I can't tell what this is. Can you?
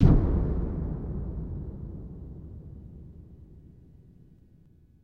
Low pitched boom noise + reverb
reverb, design, big, boom